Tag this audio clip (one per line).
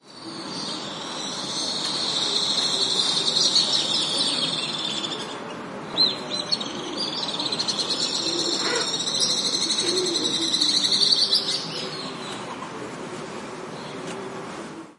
alpine-swift
birds
birdsong
field-recording
mountains
nature
screeching